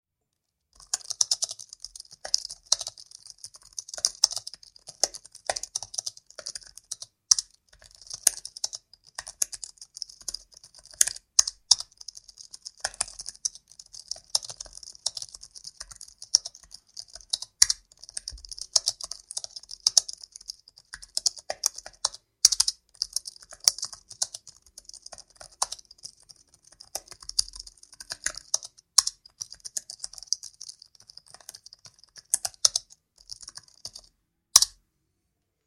014 - Sisor switch keyboard.L
Sound of a cougar scissor switch keyboard.
computer, keyboard, keystroke, laptop, mouse, noise, office, pc, scissor, switch, tone, type, typing